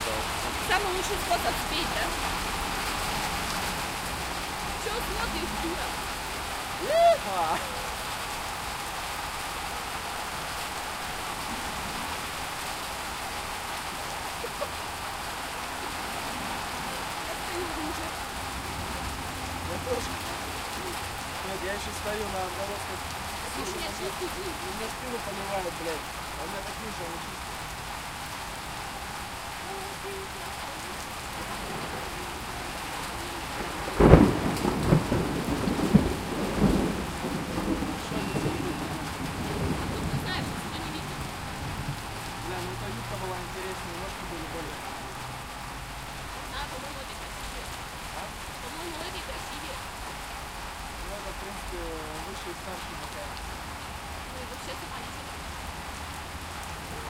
Thunderstorm in the city. Russian peoples are speaks and laughs. Sound of cloudburst. City noise. Cars drive over wet road.
Recorded: 2013-07-25.
XY-stereo.
Recorder: Tascam DR-40, deadcat.
speak, field-recording, city, thunder, storm, weather, nature, thunder-storm, rain, street, russian, noise, lightning, peoples, rumble